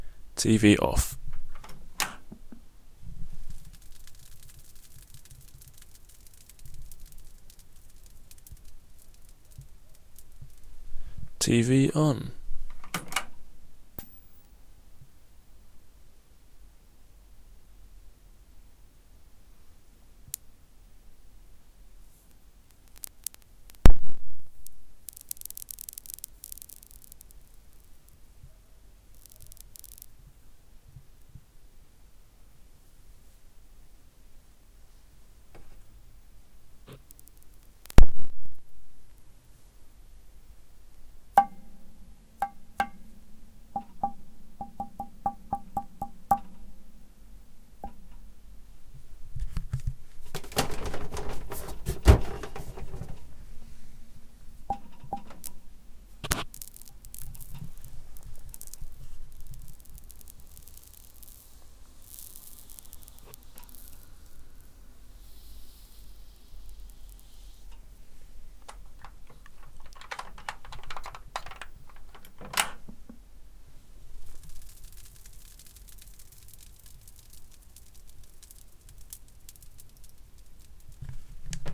TV Sounds [RAW]
Messing around with my TV. YOu can hear it interfering with the mic part way through.
Used M-Audio Microtrack 2.